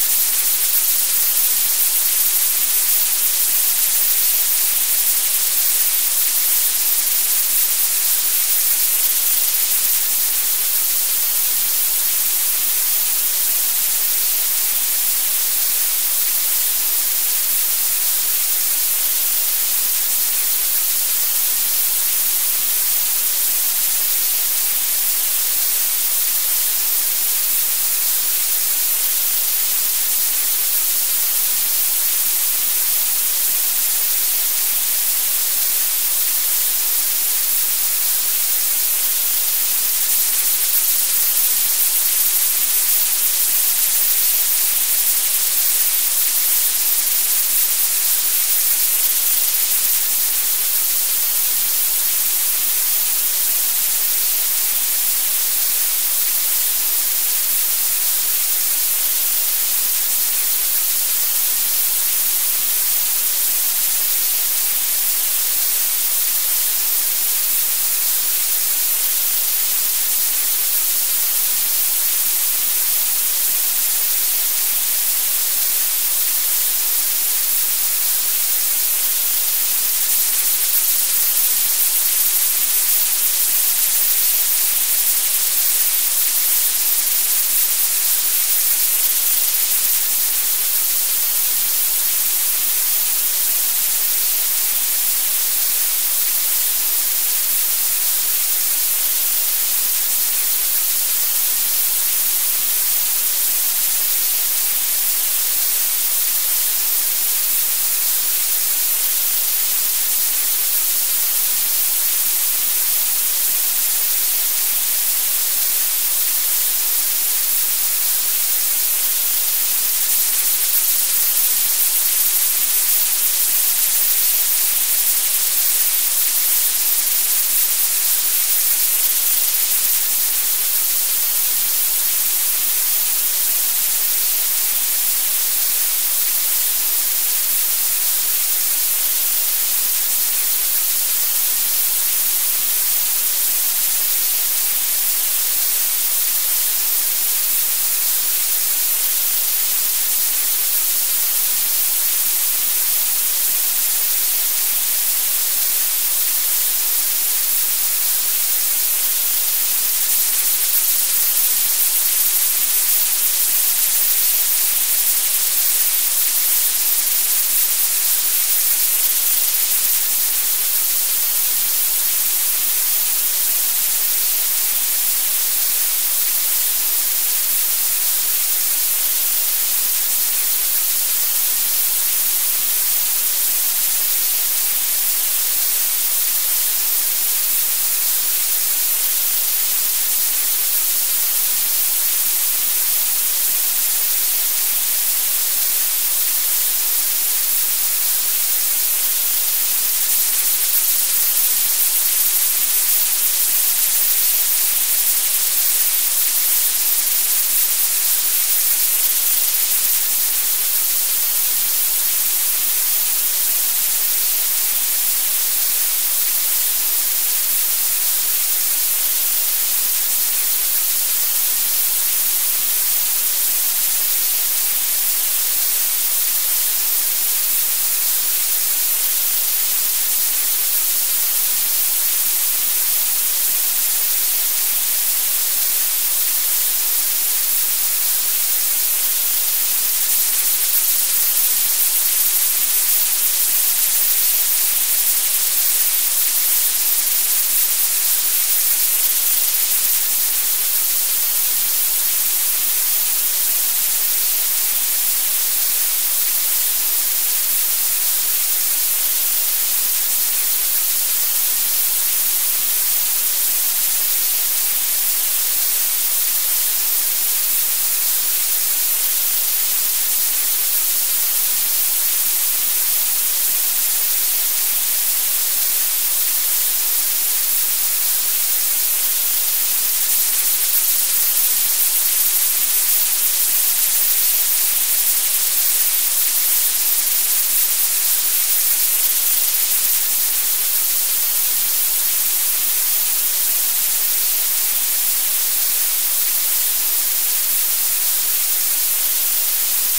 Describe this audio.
Blue noise is also called azure noise and its power density increases 3 dB per octave with increasing frequency over the frequency range.